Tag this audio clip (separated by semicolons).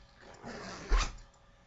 logoff; metaphor; recorded; vista; windows; xp